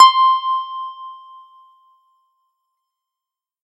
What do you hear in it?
DX Harp-C5
The DX-Harp sound,made popular by Ray Lynch. Created with Dexed-VST and audacity
DX-Harp, DX-7-Harp, Harp, Dexed-Harp, FM-Harp, Electric-Harp